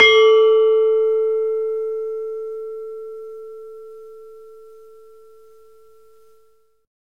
Sample of a demung key from an iron gamelan. Basic mic, some compression, should really have shortened the tail a bit. The note is pelog 5, approximately an 'A'
demung, gamelan, pelog